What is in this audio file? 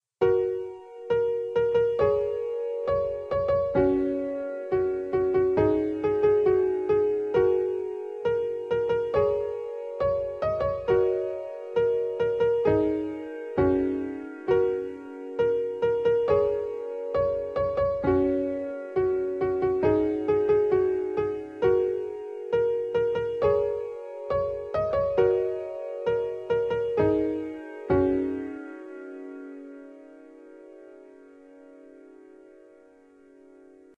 a soothing song
This is a short, soft, relaxing song i made on my keyboard that i composed myself.
song, calm, mellow, a, relaxing, gentle, piano, soothing, soft, rhythm